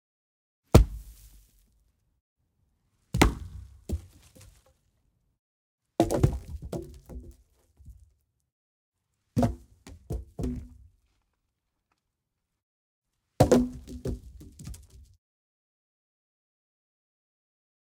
FXSaSc Wood Tree Trunk Impact Ground Forest 5 Variants
Tree Trunk Wood Impact Ground Forest 5 Variants
Recorded with KM84 on Zoom H6
Tree, Trunk, Fall, Crash, Boom, Forest, Ground, Hit